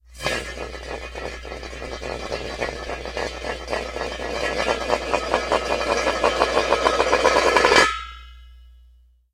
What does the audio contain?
Hubcap rolling away from a car crash and rattling and clanging as it comes to rest. Actually, I needed the sound of a hubcap to add to an auto accident crash sfx and recorded this POT LID in my basement using my laptop computer, Audacity, and an inexpensive condenser mic. Hubcap2 is the pot lid rattling around and coming to rest on the linoleum covered floor in my basement. (The mic was closer to the POT LID for Hubcap2.)
pot-lid, accident, car, clang, linoleum, rattle, metal, auto, automobile, hub-cap, car-crash, hubcap, foley